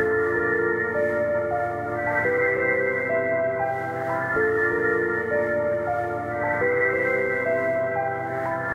piano loop 1212 DTBlkFx BizarreEcho3
110-bpm, 110bpm, ambient, delay, DTBlkFx, loop, lounge, piano, reverb